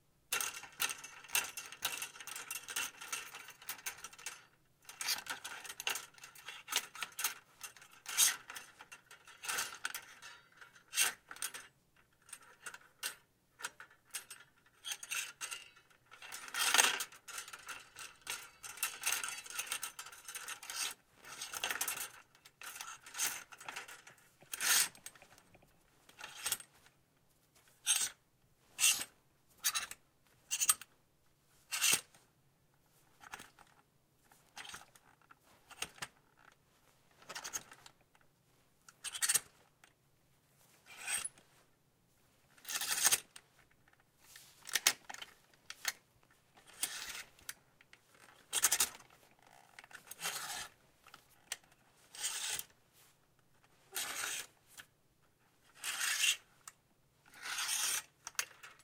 Cloths hangers closet
Clothing on hangers. Hangers rattling and sliding.
closet, hangers, rattling, sliding